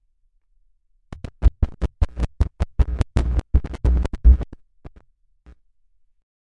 mic bad connection
The sound my xlr mic makes when bump it.
cable, xlr, microphone, mic